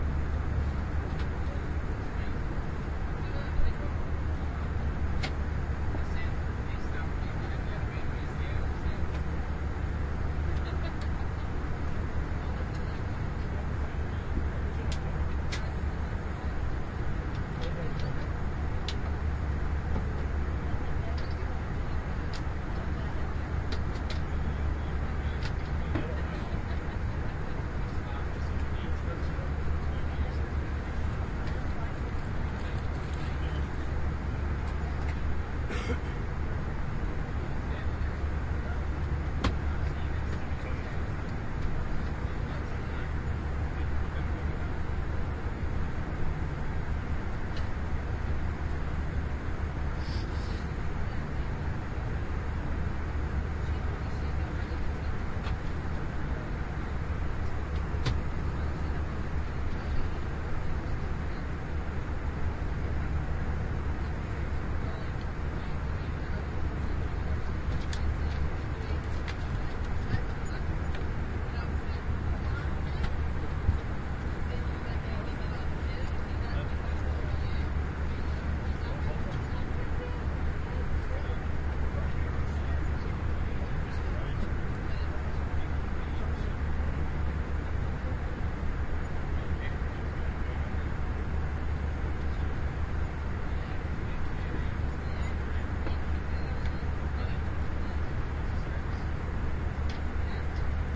Airbus during flight
Sounds inside the cabin of a flight between Berlin and Glasgow in an Airbus A 319, recorded with the Soundman OKM II microphones and Sharp Minidisk MD-DR 470H.
aeroplane, airbus, binaural, cabin, field-recording, flight